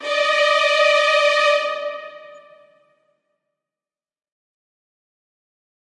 String Thrills 002 (120)
String Thrills. This is not a loop, but recorded at 120 bpm.